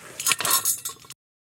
To transform chains into something else.
Recorded with a Tascam Dr100 in Santa Cruz.